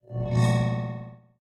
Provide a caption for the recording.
A 8 bit game entrance.
Created With FL Studio, used Sylenth1, and some others effects plugins.
logo, entrance, 8bit, game